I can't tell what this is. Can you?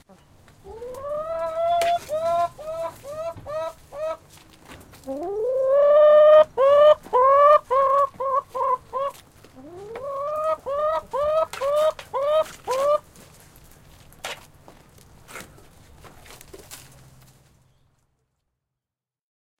Chicken house1
Sounds of some happy chickens scratching around and eating.